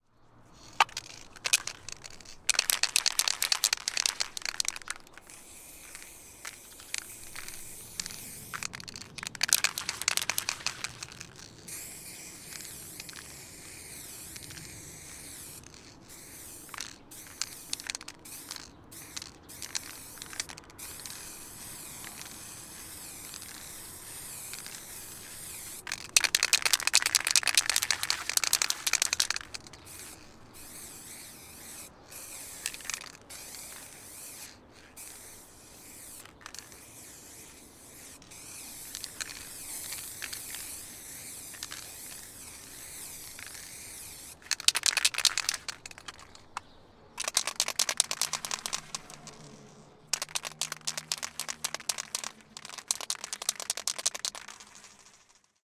Graffiti - Dose schütteln und sprayen
Shaking a graffiti can, spraying paint onto a wall
can, field-recording, graffiti, paint, shaking, spray, spraying